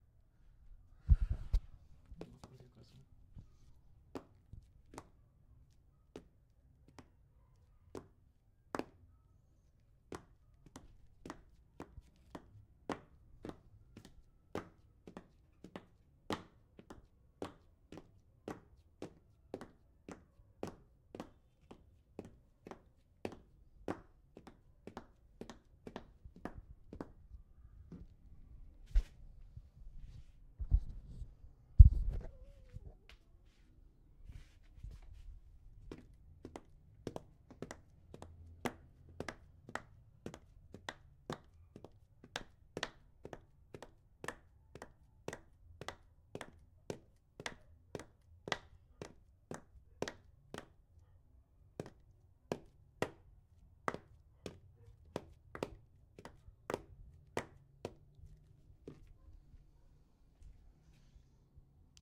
high heels on exterior
footsteps, ground, steps, walking, walks